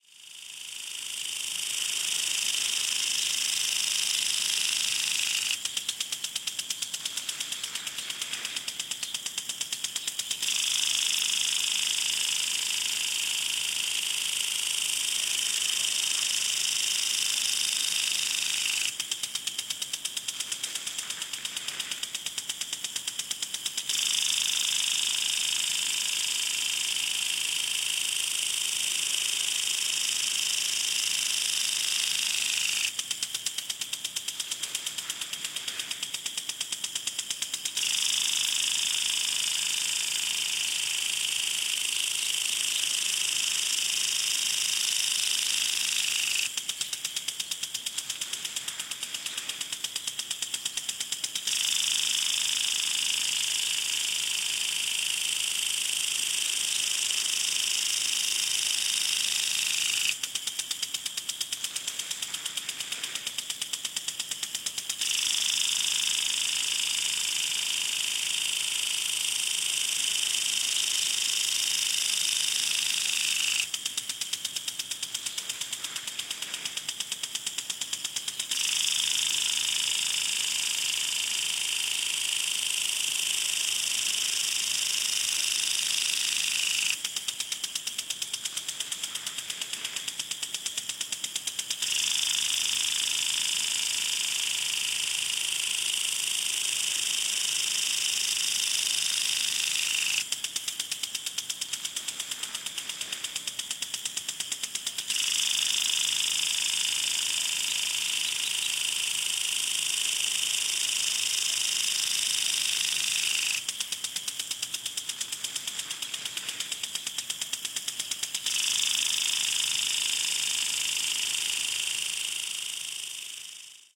20160720 home.lawn.sprinkler.58
Noise of a home lawn sprinkler, close take. Recorded near Madrigal de la Vera (Cáceres Province, Spain) using Audiotechnica BP4025 > Shure FP24 preamp > Tascam DR-60D MkII recorder.
field-recording, irrigation, lawn, pool, sprinkler, summer, water